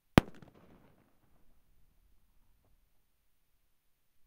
Date: ~12.2015 & ~12.2016
Details:
Recorded loudest firecrackers & fireworks I have ever heard, a bit too close. Surrounded by "Paneláks" (google it) creating very nice echo.